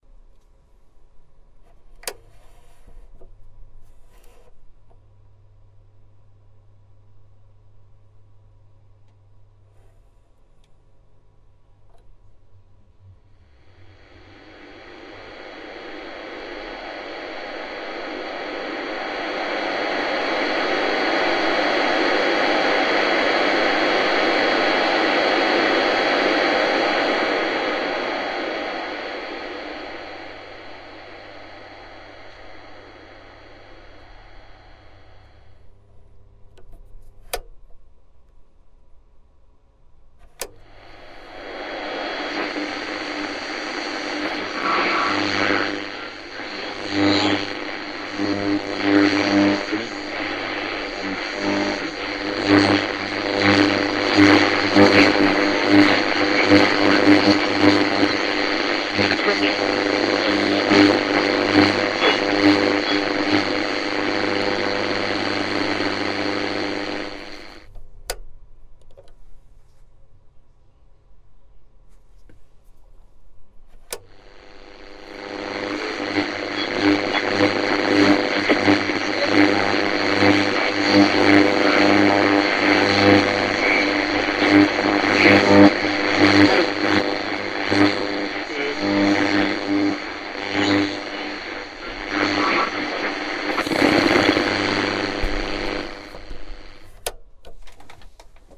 Sound of a 1950's AM radio channel surfing.
Broadcast, Noise, Radio, Static